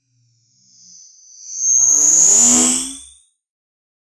HI, GRAINY SWISH. Outer world sound effect produced using the excellent 'KtGranulator' vst effect by Koen of smartelectronix.
sci-fi, effect, sound, fx, horror